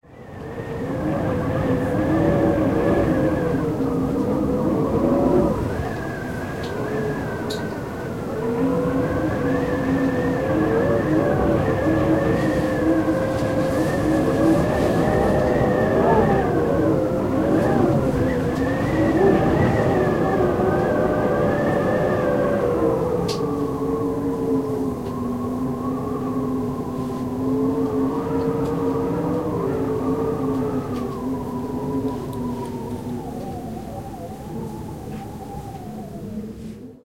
Wind Whistling Window Frame
I recorded the sound of wind whistling in a frame of our window on a windy day.
Window is located on the lodgia (windowed balcony).
Howling-Window-Frame, Weather, Whistling, Wind